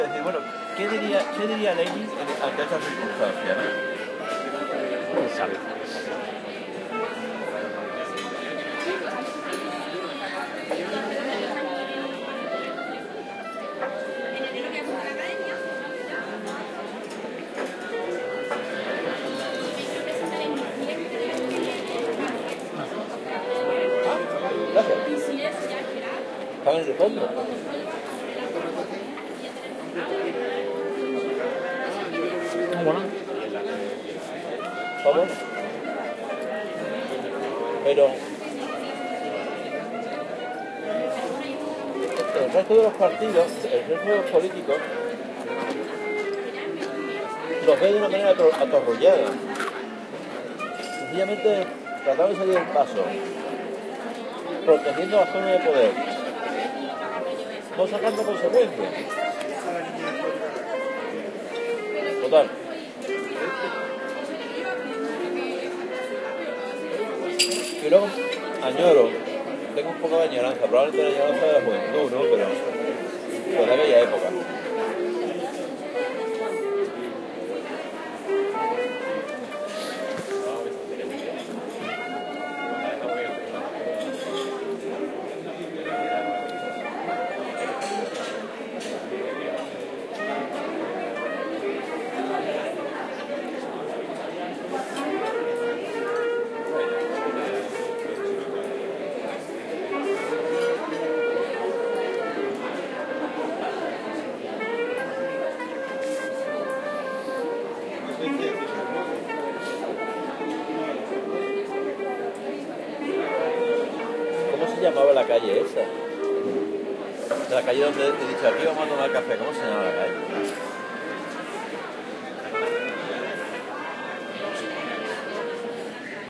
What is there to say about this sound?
sidewalk cafe ambiance, with some talk (in Spanish) and music from a clarinet performer. Recorded near Calle Larios (Malaga, S Spain) using OKM mics into PCM-M10 recorder